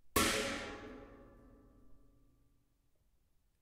Bright metallic hit. Junk Cymbal.